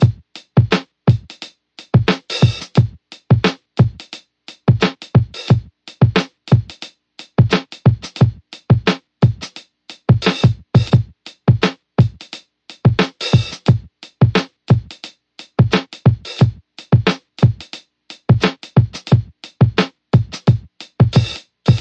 Funky Lofi Drum Loop 88 BPM
Drum, Lofi, chill, funky